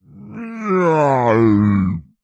Pitched down 4 semitones and compressed a bit. Otherwise no processing...sounds good with a doubler though. :) Snorting, Growling, crying out of all kinds.